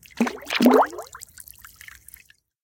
water splash 18
Water splash with gentle stereo bubbling (must hear). Recorded using binaural mics + CoreSound 2496 mic pre + iRiver H140.
you can support me by sending me some money: